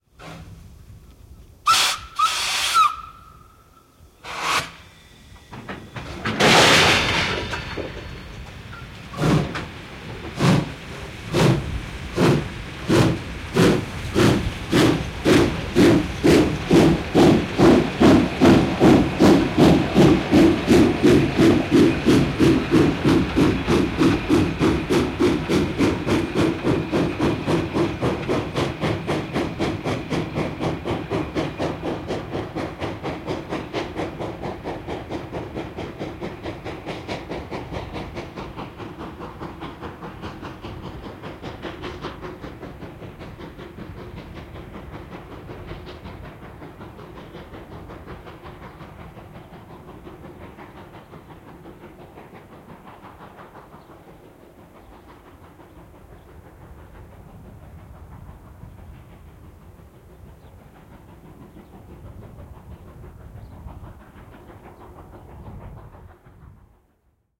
Juna, höyryveturi, vihellys, lähtö / A steam train, steam locomotive, whistle, bumpers crashing, pulling away puffing, receding
Veturi (Tr1) ja 10 vaunua. Vihellys, lähtö puuskuttaen, puskurit ryskyvät, juna etääntyy.
Paikka/Place: Suomi / Finland / Rajamäki
Aika/Date: 06.04.1971
Field-Recording, Finland, Finnish-Broadcasting-Company, Juna, Junat, Raideliikenne, Rail-traffic, Railway, Rautatie, Soundfx, Steam-train, Suomi, Tehosteet, Train, Trains, Vihellys, Whistle, Yle, Yleisradio